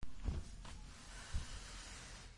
Sack dragged, simulating movement of clouds.